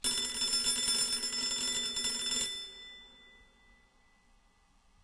A small electric bell going off, was supposed to be a school bell but could be used for a doorbell, alarm, etc.